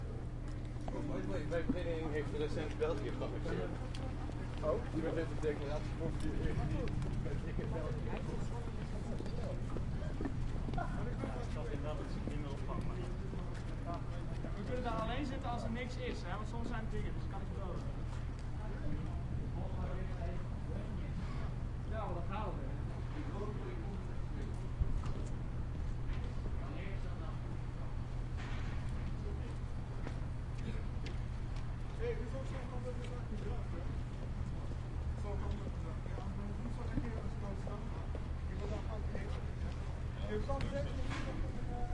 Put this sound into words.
20121112 TU Delft Library entrance, ext - ambience at stairs, voices in the foreground
Exterior ambience at the entrance stairs of the library building at Dutch university TU Delft. Footsteps and dutch voices. Recorded with a Zoom H2 (front mikes).